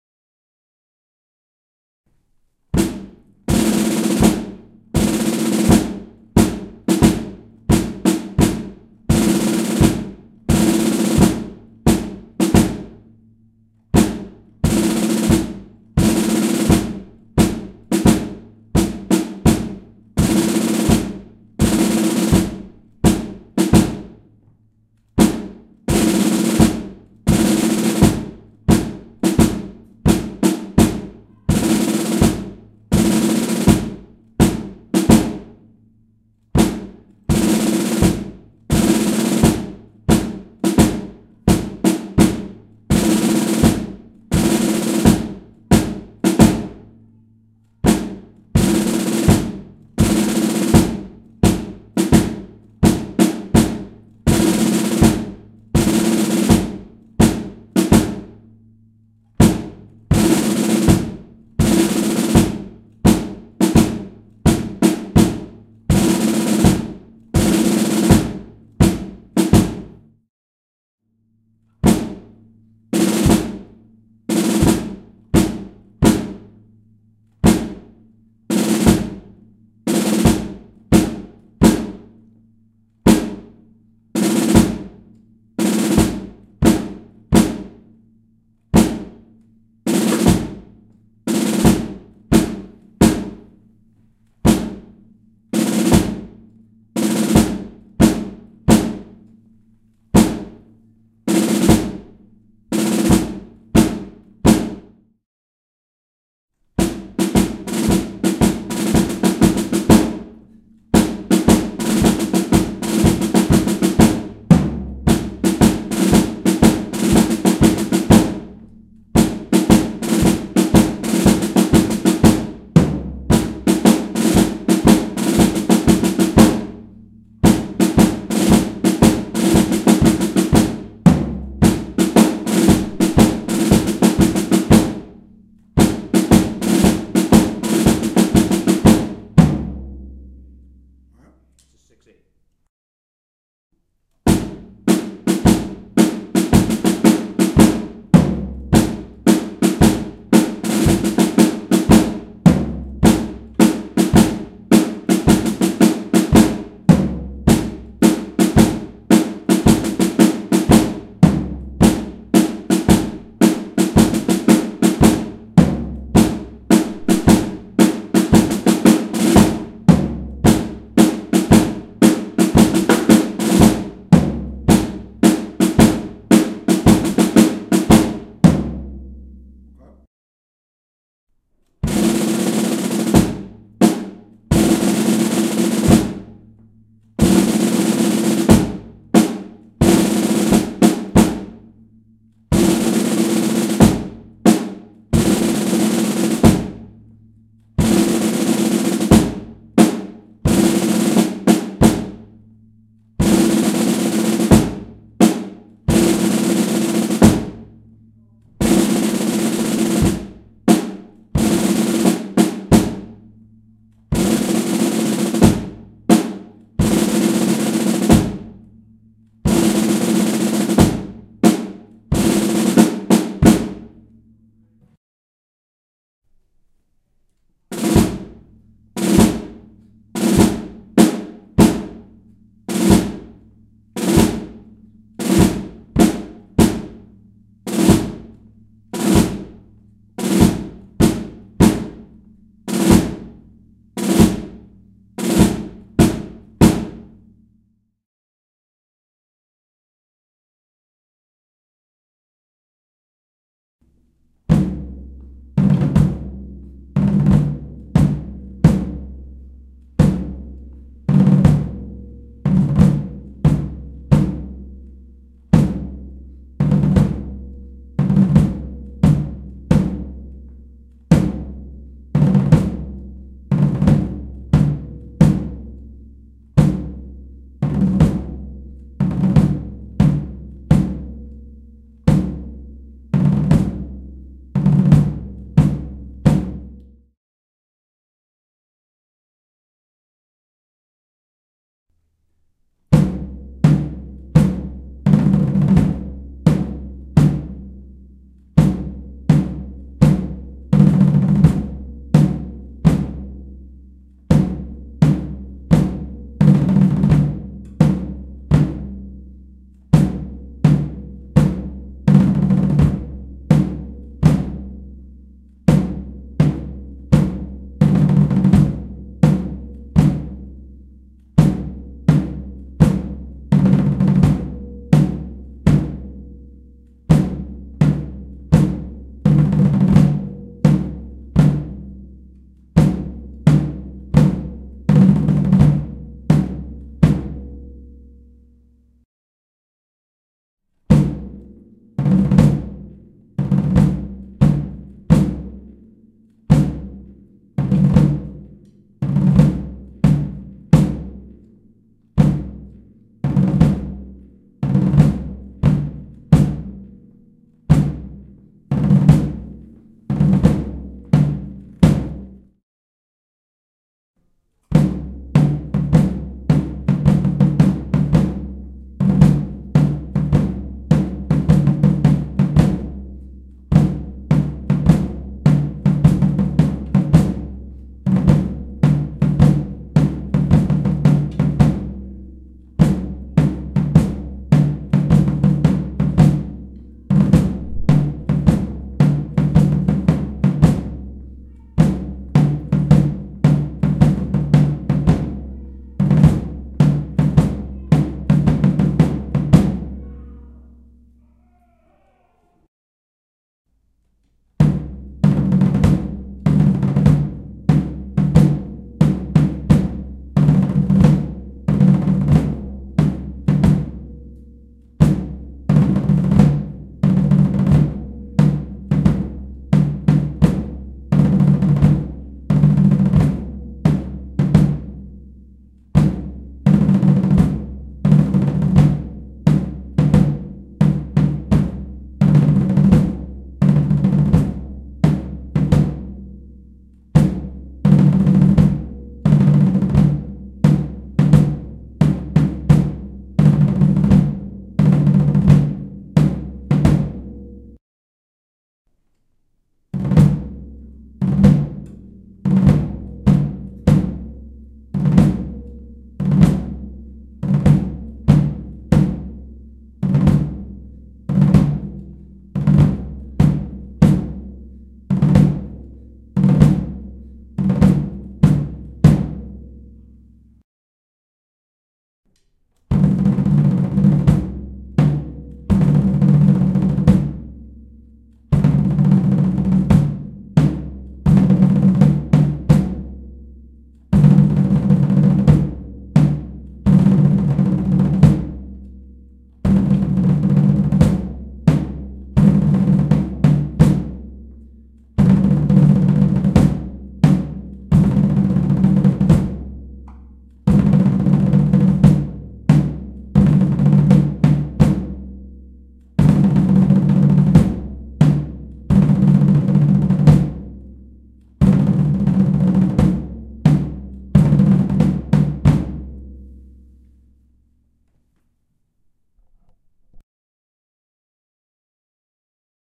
13 drum cadences
Welcome to Rome. We needed some drum cadences for Julius Caesar and I was unable to find any i really liked so we grabbed our orchestra drummer here at the theater and we came up with 13 different cadences for us including JFK's funeral march.
most are done on snare and then again on a single tom, easily looped for duration. They have no verb on them so you can add as you desire for your space
I like to know it's helping a fellow sound designer out.
cadences, drum, drums, military, percussion, toms